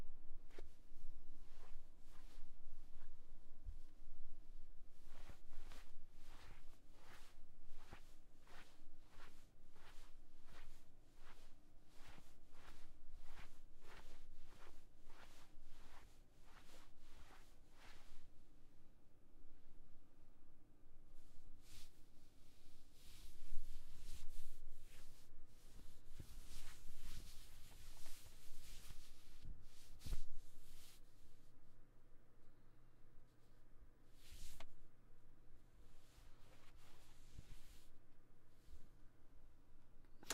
clothes movement foley
clothes, foley, movement